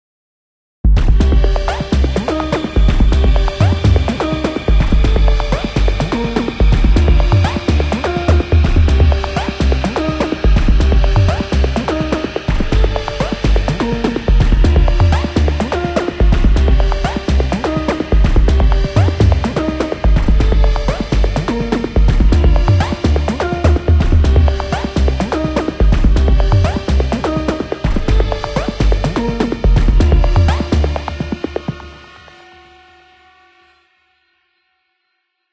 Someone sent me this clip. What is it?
Dimensions Arps by DSQT 125 bpm
This is a mid-heavy modulated layered synth arp I made in Ableton Live. Pour le connoisseur! :D Best for EDM works since the tempo is 125 bpm. Just drop a 'boom-tsss-boom-tss' beat on it and there you go! Enjoy & make something out of it!